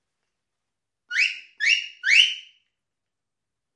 A stereo recording of a sheepdog whistle command.Come Here brings the dog to the handler . Rode NT4 > FEL battery pre-amp > Zoom H2 line in.